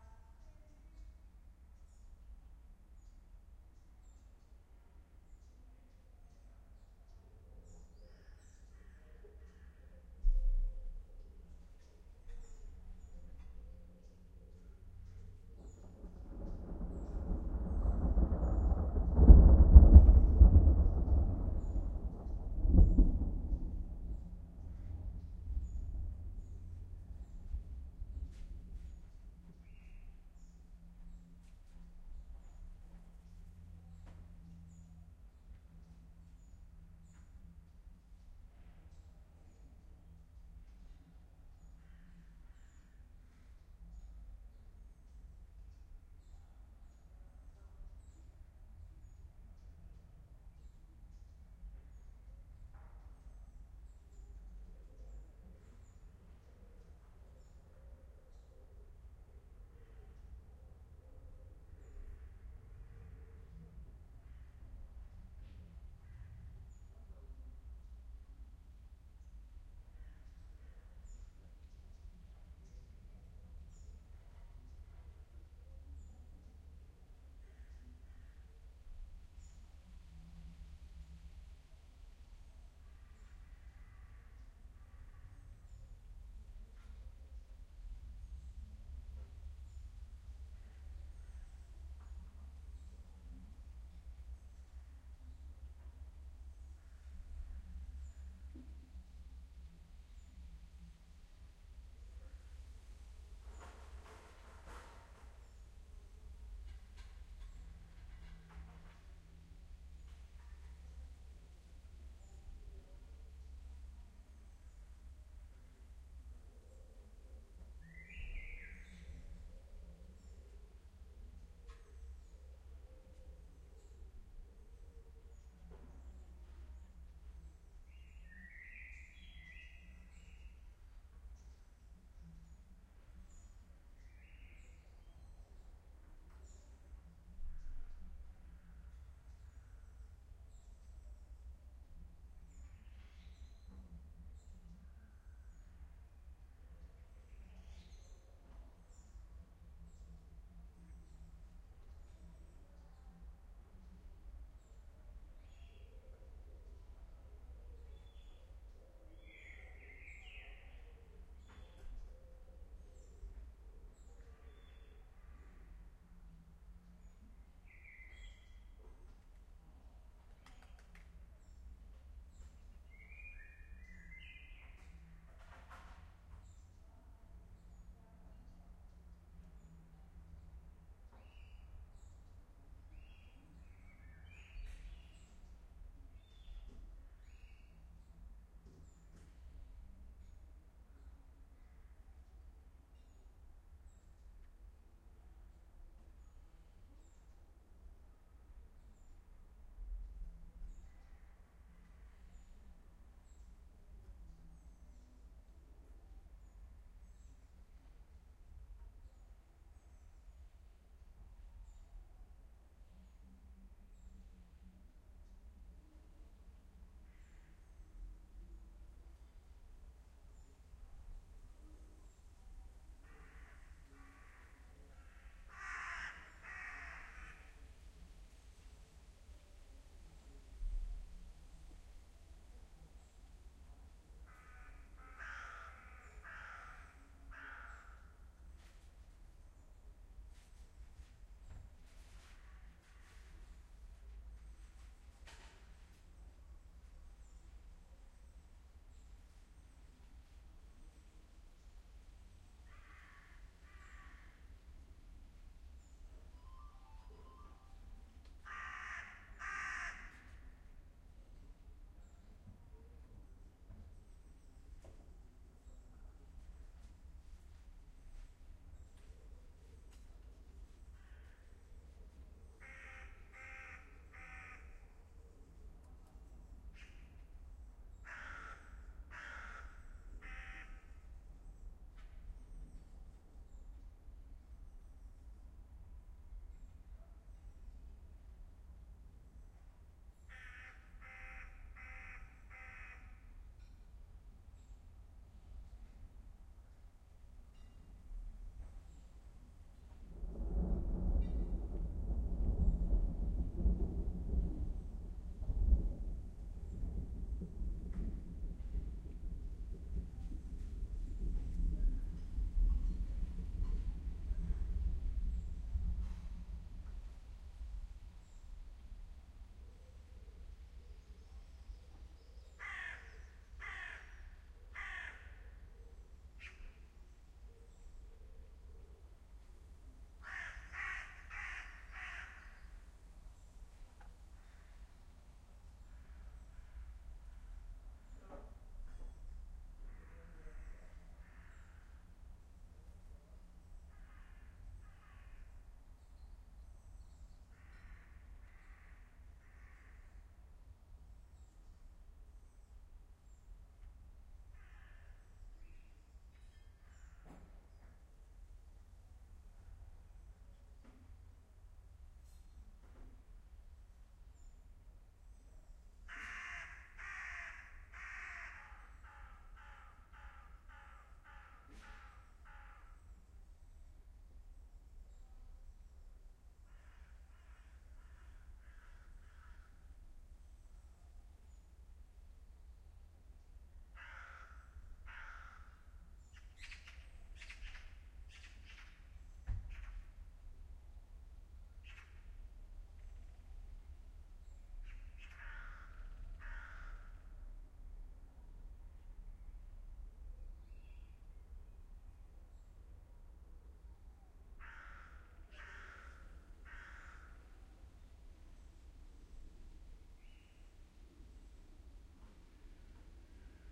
A bit of a distant thunderstorm, recorded at the beginning of June, using a FEL preamp and Shure wl-183 microphones into an iriver ihp-120.
athmosphere, field-recording, thunder, thunderstorm